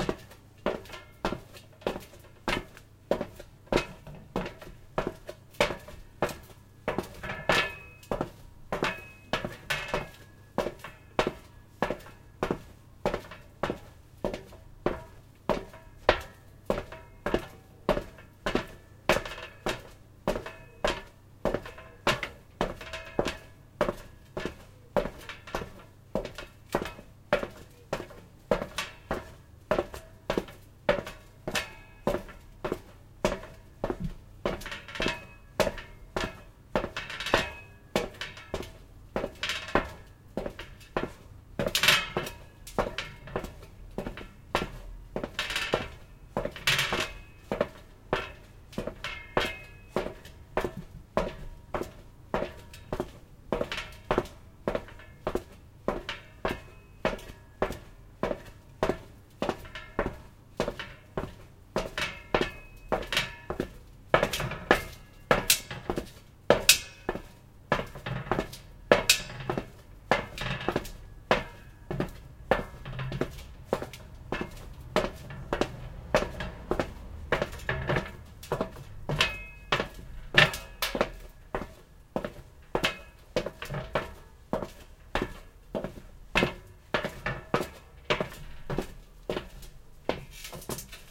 Metal Footsteps
Me walking on a metal grate in hard soled shoes. Some of the sounds are unpleasant but most are salvageable if you are looking for a casual walking sound.
footstep, foley, metal, creak, squeak, step